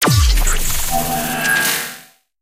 Radio Imaging Element
Sound Design Studio for Animation, GroundBIRD, Sheffield.
bed, bumper, splitter